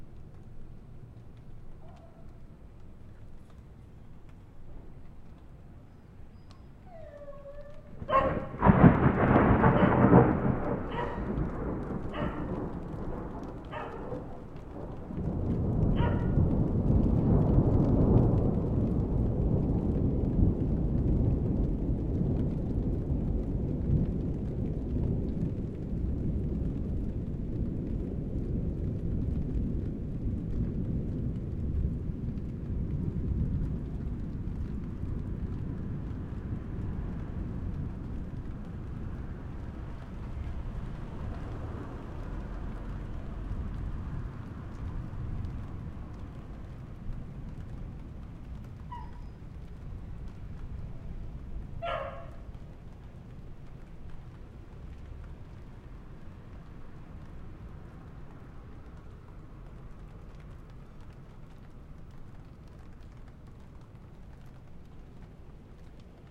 Ambiente nocturno grabado en el barrio de Florida, Buenos Aires.
Perro y trueno en una noche de verano.
Grabado con zoom h4n + Sennheiser ME66
Night scene recorded in the neighborhood of Florida, Buenos Aires.
Dog and thunder on a summer night.
Recorded with h4n zoom + Sennheiser ME66